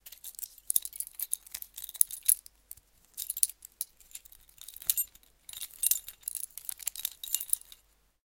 keys searching
Searching for the right key out of the bunch. Recorded with Oktava-102 mic and Behringer UB1202 mixer.
foley, keys, metal